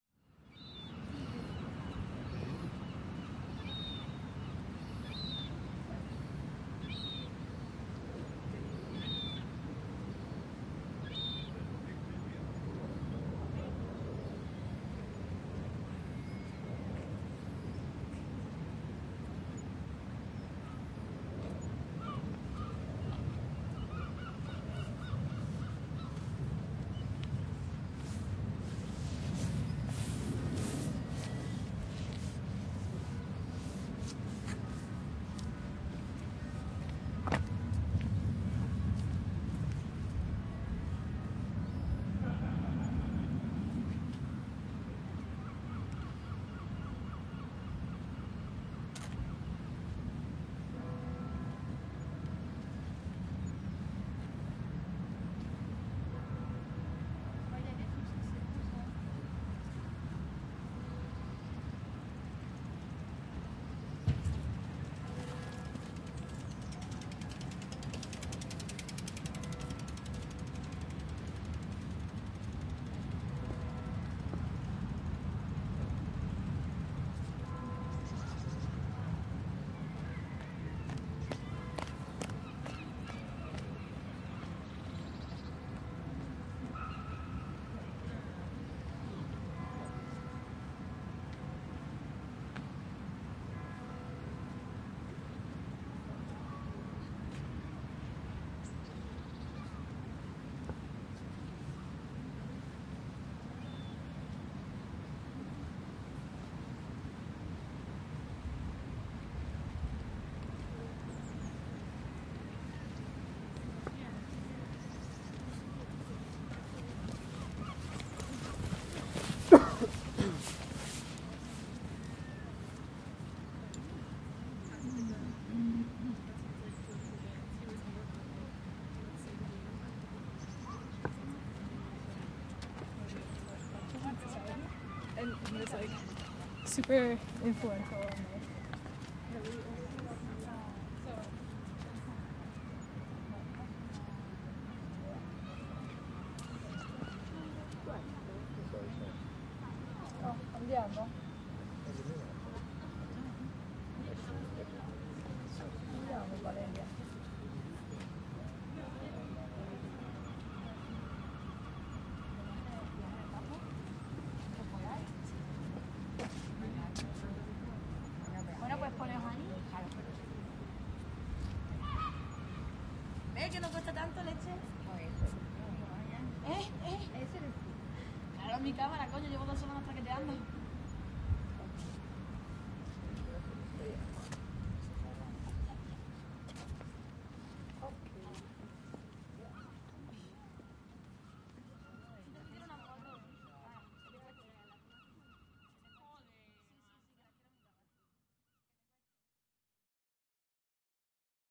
In a garden in front of the Castle of Dublin
date: 2011, 11th Aug.
time: 09:00 AM
place: Outside the Castle, in a garden (Dublin, Ireland)
description: In this recording some people passing from the left to the right and on the contrary, speaking about different topics and in different languages. As background the natural sounds of the garden in front of the castle of Dublin.
garden, language, urban, wind